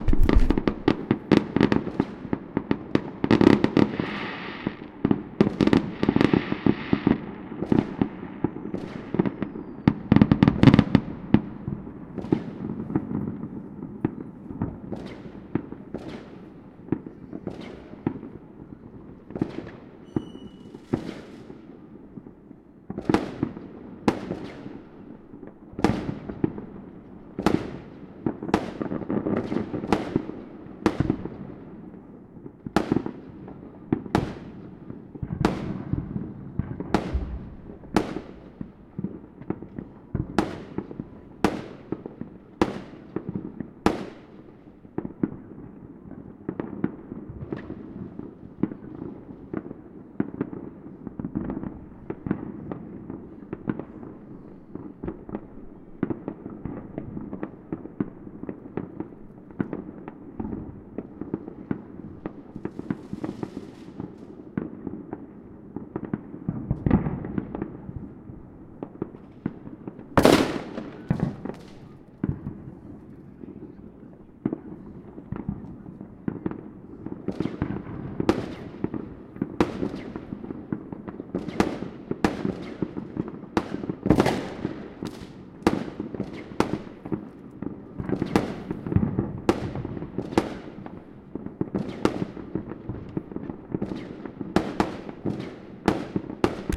Fireworks without or with not so much peaks.